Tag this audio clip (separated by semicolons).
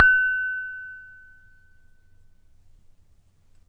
vidro; glass; taa; Crystal; bowl